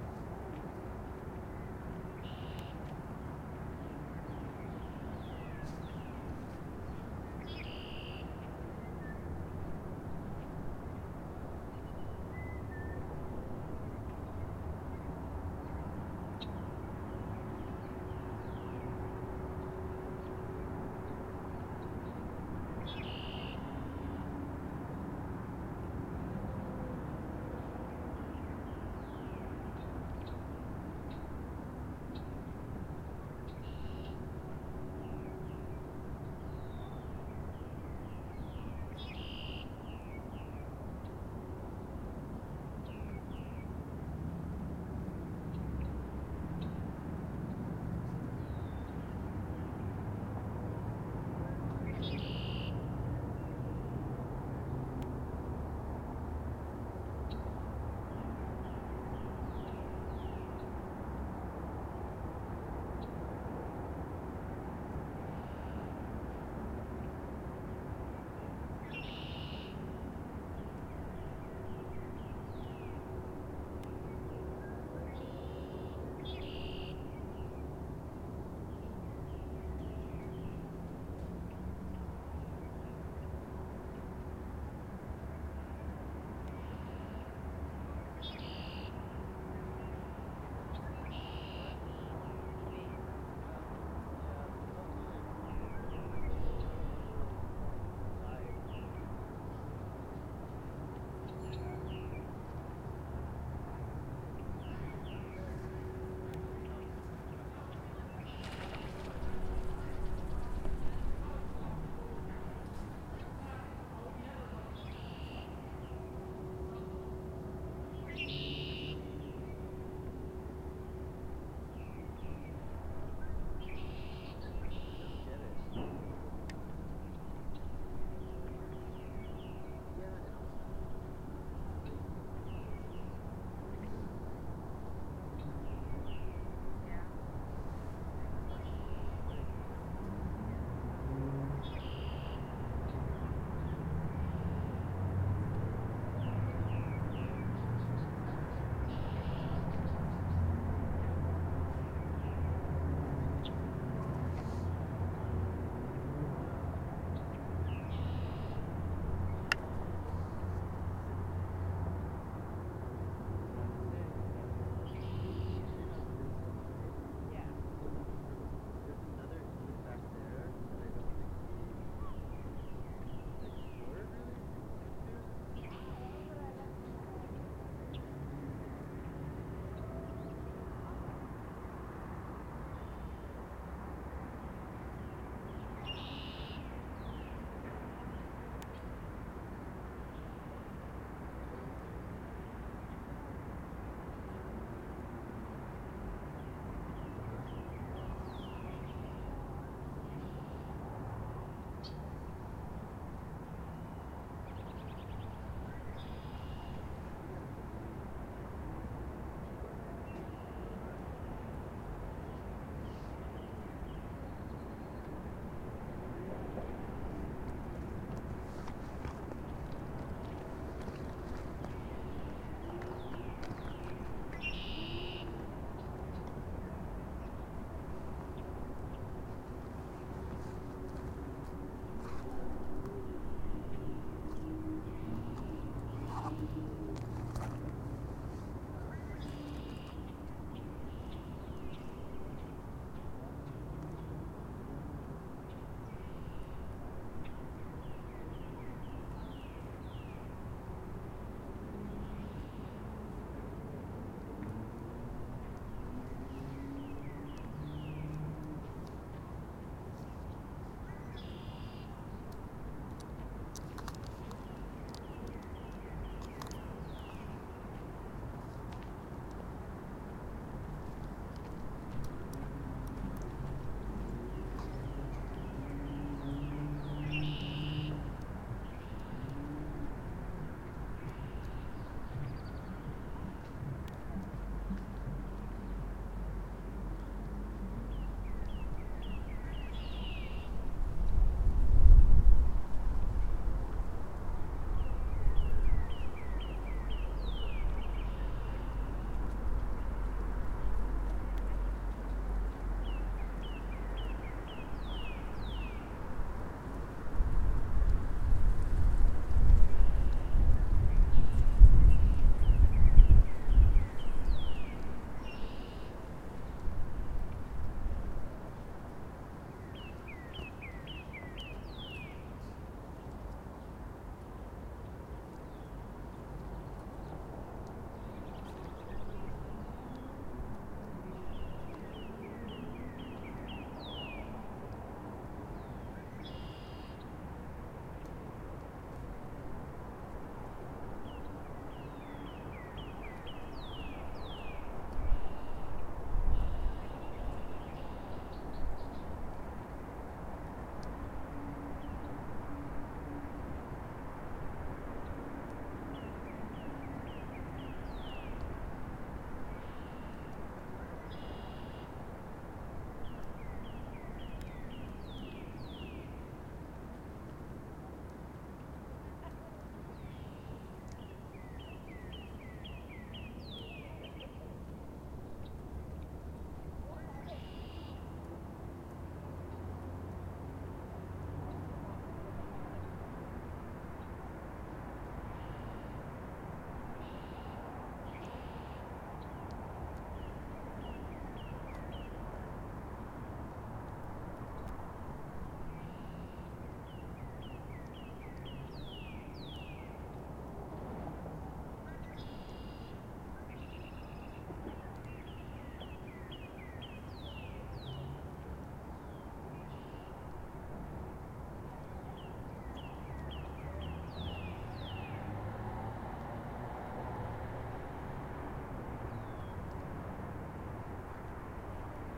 Various birdcalls and bird song, taken at East Rock Park in New Haven, CT on April 30, 2022. Tascam DR-40X, unprocessed.